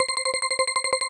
Climb Rope Loop 00
An looping 8-bit climbing rope sound to be used in old school games. Useful for climbing up stuff like ladders, slopes and brick walls.